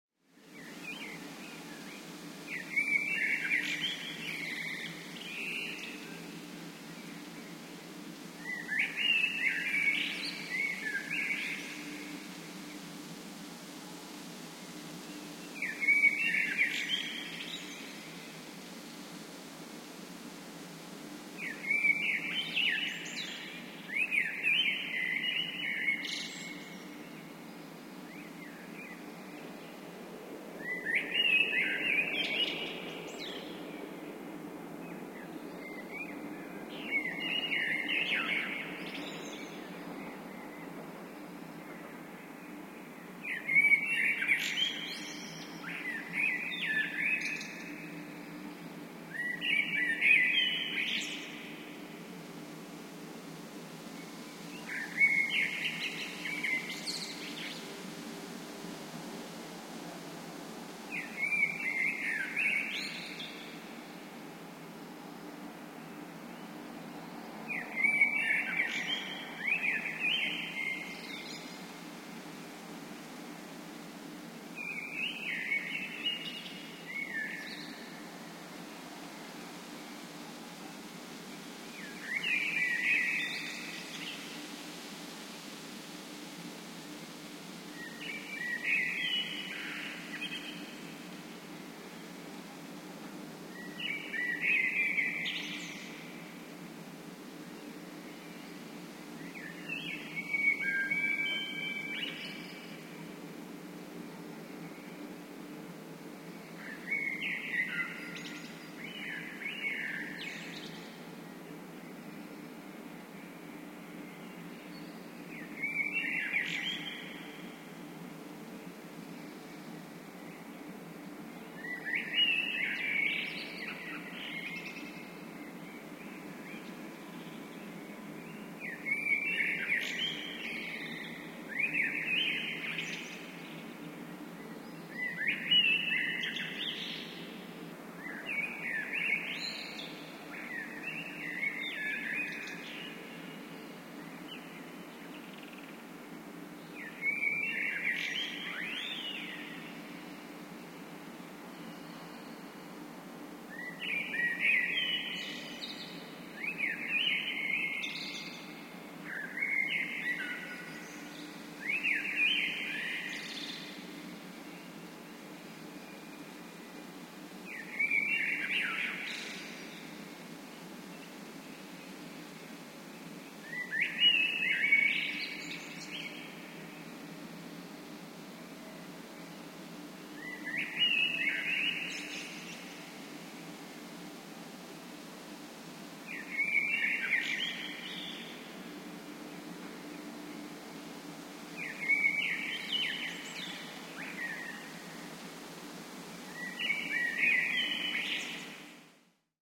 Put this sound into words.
Very seletive recording of a birdsong early in the morning on the begining of April.
The city ambience was heavily processed, so when the car appears it sounds quirky. It is left there on purpose, as I guess everyone is going to cut only the part that one needs.
Equipment: Sony PCMD100